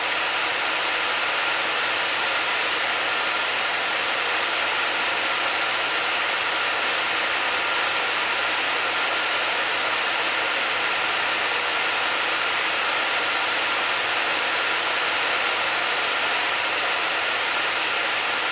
Close-up sound of fan blowing.
blow, close-up, fan, wind